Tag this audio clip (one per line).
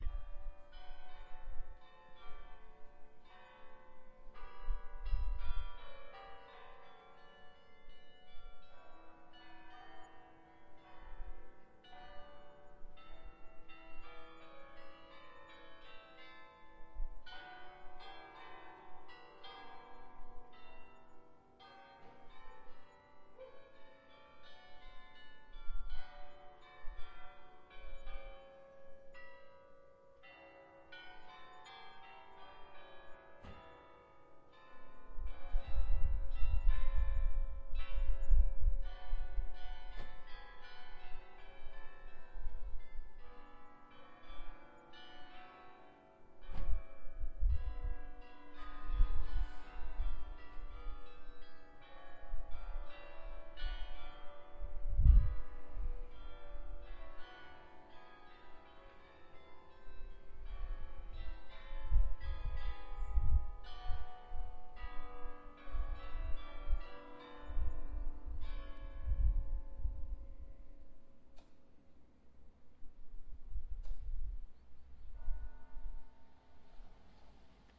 Bells
Cathedral
Church